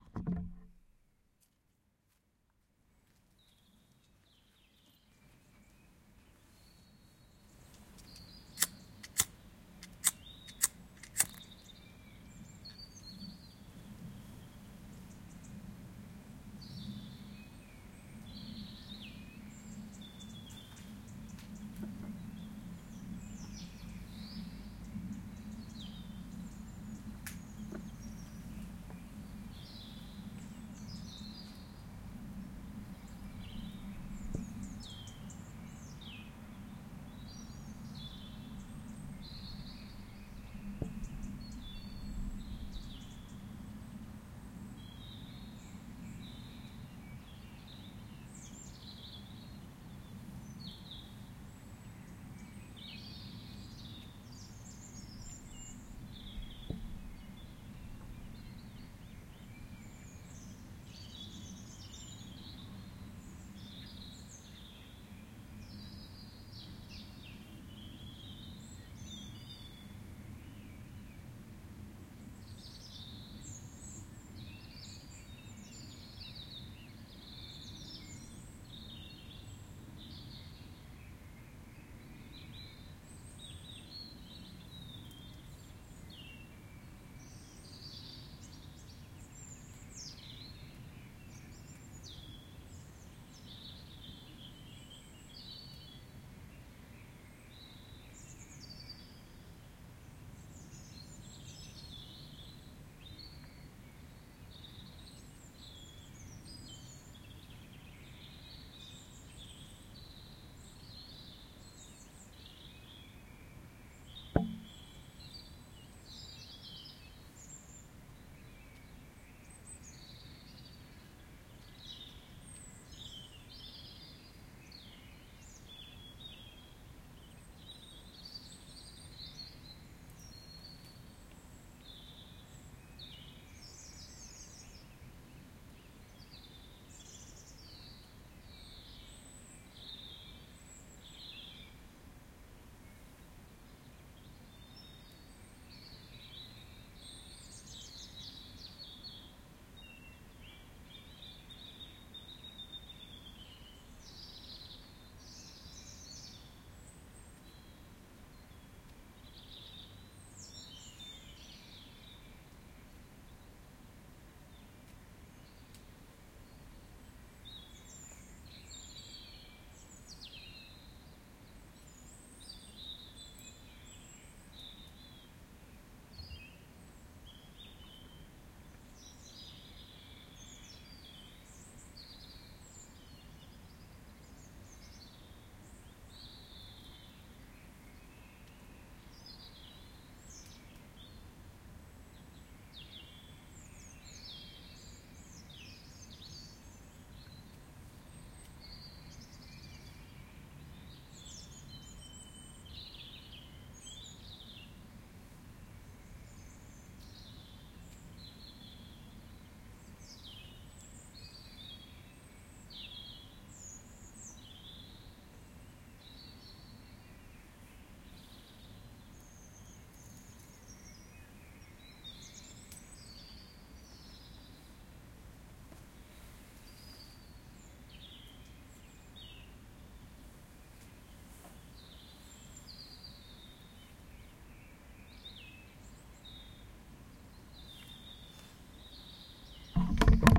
Outside Ambience Night
A recording taken at 4:00am in the morinng in my garden
quiet, birds, Night, Outside, winds, Ambience, london